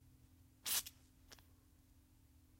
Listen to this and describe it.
FX Squirt
A squirting liquid sound.